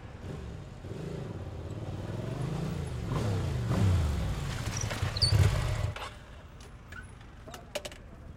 Ducati monster 4
DUCATI MONSTER
start, break
ntg3_zoom h4n
motorbike, ducati, monster, motorcycle, breaking, engine, start, bike, motor